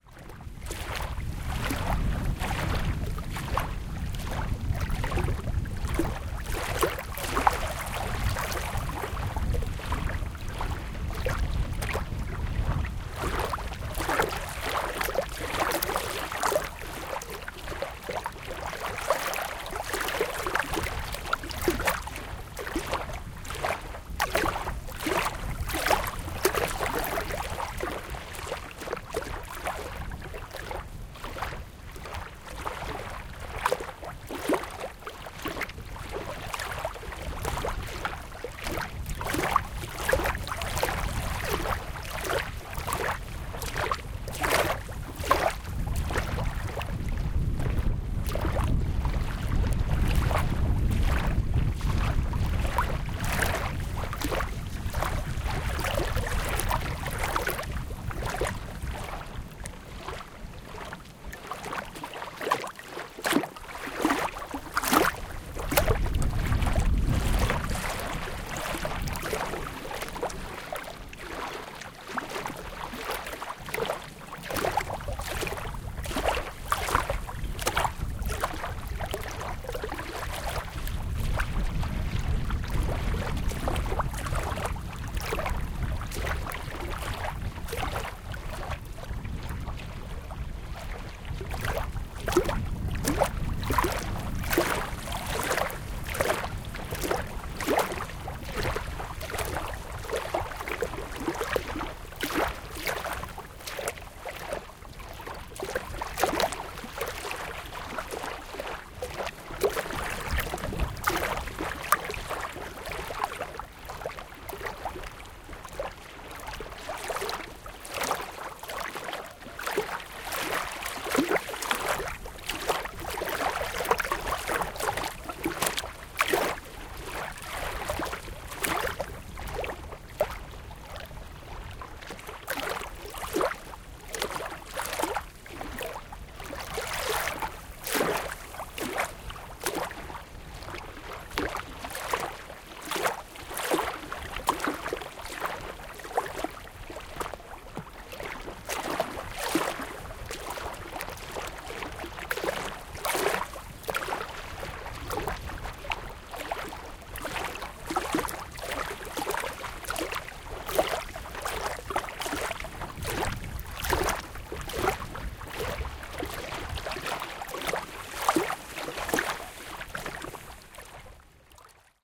Maziarnia Lake - waves and wind - Zoom H2n
Artificial Maziarnia Lake in Poland. Sound of waves reaching the shore, with strong wind.